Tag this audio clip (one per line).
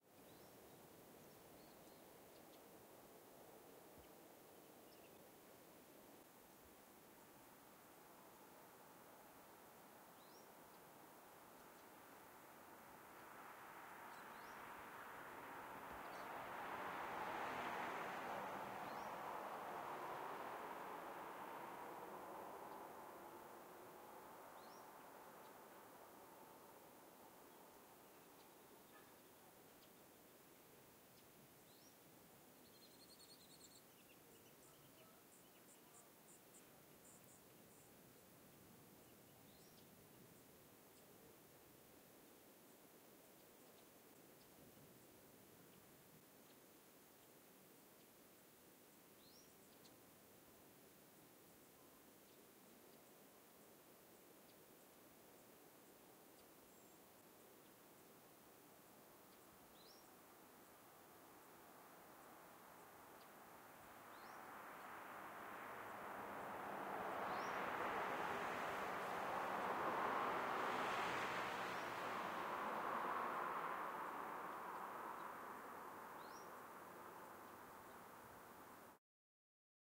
ambisonic
b-format
birds